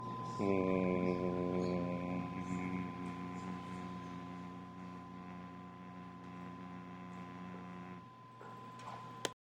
vocal drone pure
A bass low pitched drone
drone, vocal